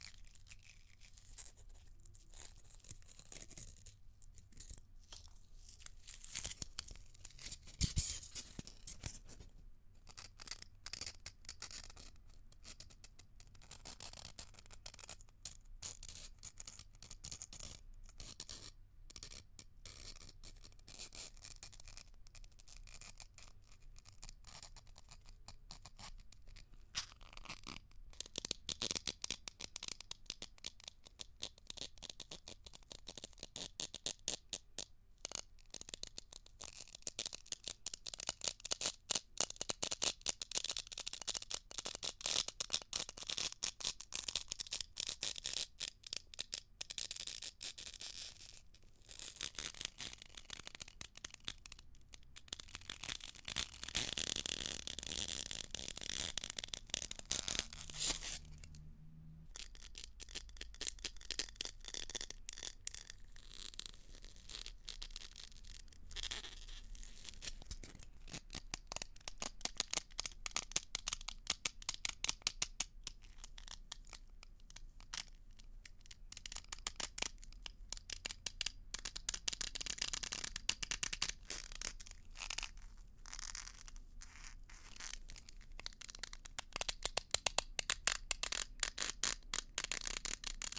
Ice - Styrofoam - Crackling - Foley
Some styrofoam tearing I recorded for a short movie in which glaciers formed. You have to get a little creative with it ;)
Ice, Close-miked, Styrofoam, Foley, Crackling, Pops, Cracks